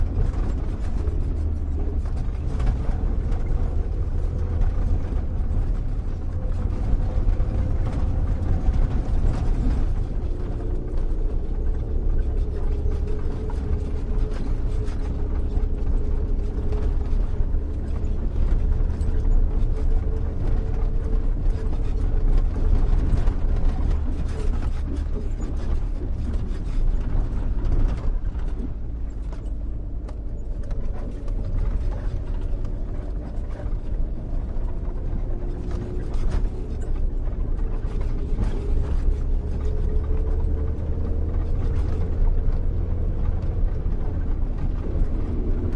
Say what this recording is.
auto truck van int driving bumpy great dirt road to village metal rattle2